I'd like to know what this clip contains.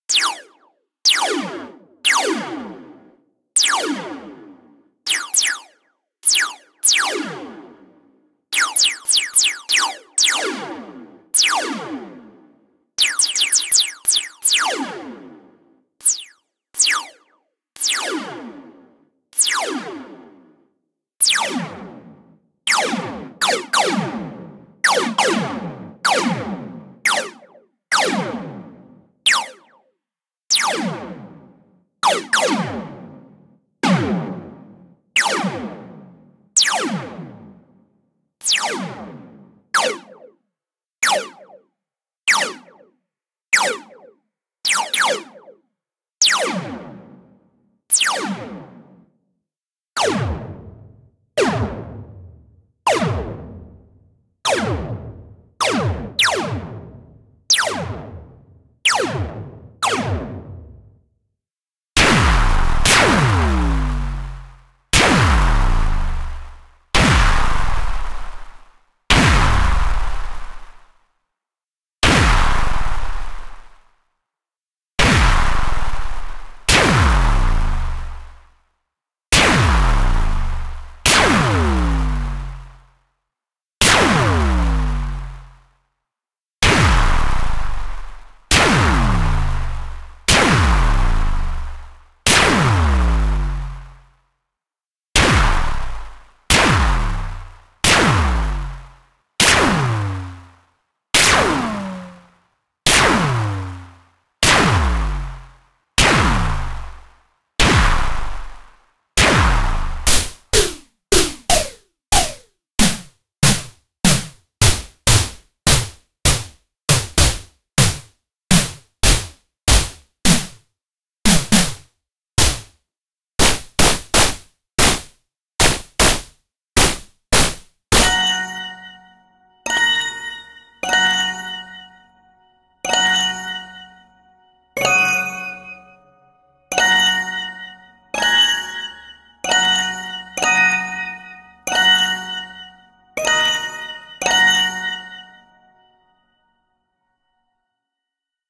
Collection of space shooter SFX for cutting and using in your game.